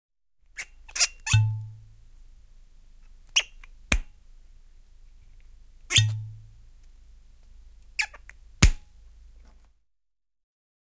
Pullo, korkki auki ja kiinni / A plastic bottle cap, opening, closing, a close sound
Muovikorkki. Korkki auki ja kiinni. 2 x. Lähiääni.
Äänitetty / Rec: Analoginen nauha / Analog tape
Paikka/Place: Yle Finland / Tehostearkisto / Soundfx-archive
Aika/Date: 1980-luku / 1980s